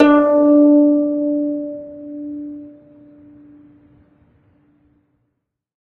single string plucked medium-loud with finger, allowed to decay. this is string 16 of 23, pitch D4 (294 Hz).
acoustic, zheng